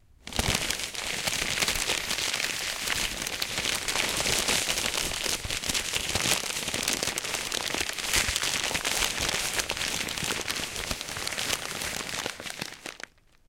rustle.paper 2
recordings of various rustling sounds with a stereo Audio Technica 853A